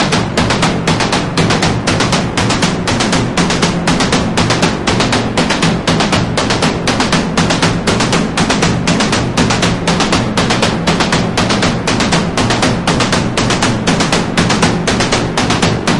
industrie trom 1
loud, screaming, synthetic tekno shit.
loop, techno, tekno